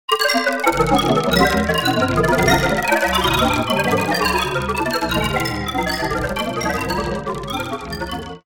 a tabla sample, granulated and pitch shifted